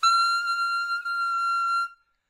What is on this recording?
A high-pitched mouthpiece-only sound.
high, howie, mouthpiece, sax, smith